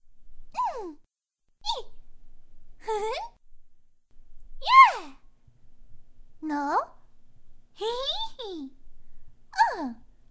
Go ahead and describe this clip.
Anime Magical Girl Voice
Me trying to sound like a cute anime girl, and the normal sounds one would make. If you want, you can place a link into the comments of the work using the sound. Thank you.
anime, cute, female, giggle, girl, hurt, magical, no, voice, woman, yes